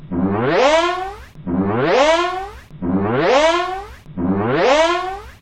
Funky Alarm
Created using Audacity